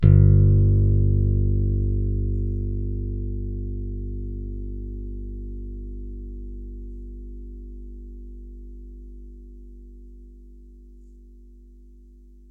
TUNE electric bass
bass, note, pcm